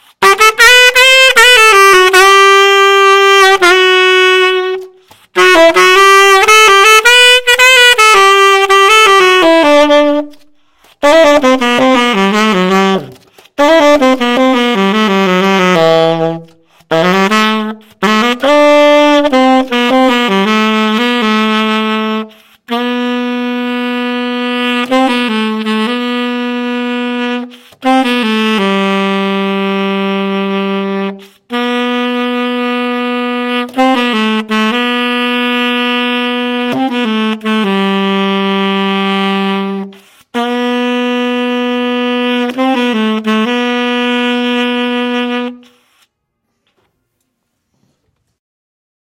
fiddling sop 1
my sax player friend played a few things for me and so i sampled parts of them (fairly long parts) to share.
used a sm57 about 3 inches away from the barrel
saxophone, soprano, fiddling